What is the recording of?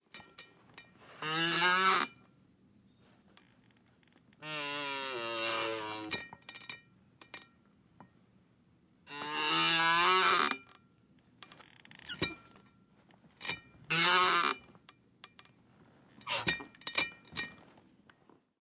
Squeaky Chair
Office chair squeaking as person sits in it.
furniture, sitting, squeak, squeaky, squeeky